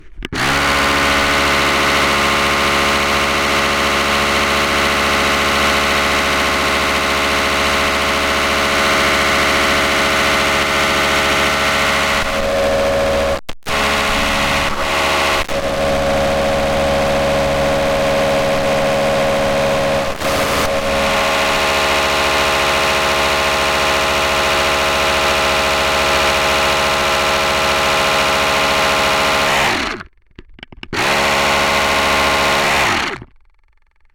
CONTACT MIC BOILING SAUCE 01
Contact mic placed on a pot full of boiling tomato sauce.
contact, liquid, sauce